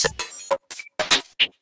A pack of loopable and mixable electronic beats which will loop at APPROXIMATELY 150 bpm. You need to string them together or loop them to get the effect and they were made for a project with a deliberate loose feel.